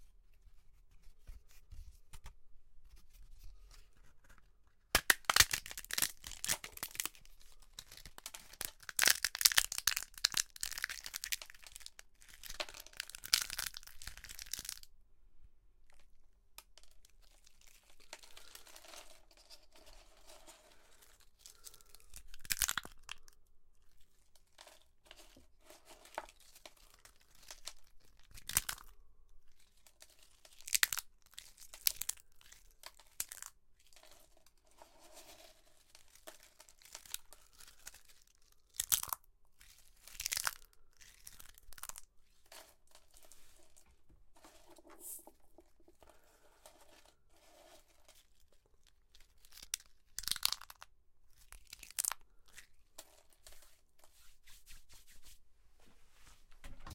Cunching Bark
found quite a large piece of dry bark so wanted to record the sounds of it crunching
bark, clean, crunch, debris, dry, snap, wood